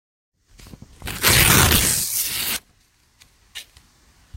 Paper ripping
I think this was a bit close to the mic, it's really loud but still effective. A single sheet of paper being torn in half smoothly without pauses.